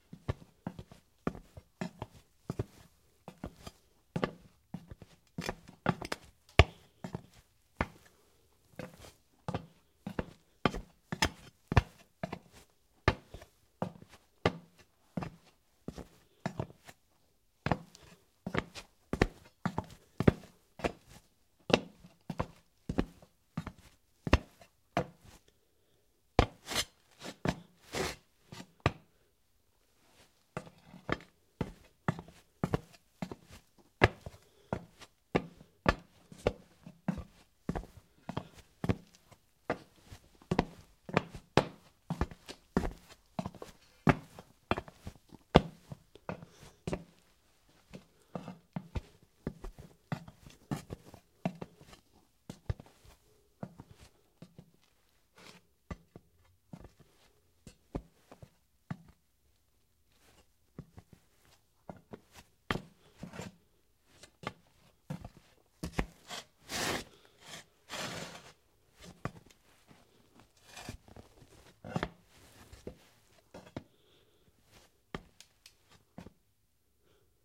Footsteps Womans Dress Flats Shoes Ceramic Stone Tile
Footstep foley of woman's dress shoes walking on ceramic tile.
foley, footsteps, fx, sfx, sound, soundeffects, soundfx, studio